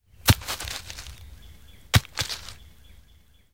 The sound of some apples dropping with a nice, soft thud on a forest floor composed of wet leaves and soft pine needles.
You'll also hear a light background of forest birds in the background.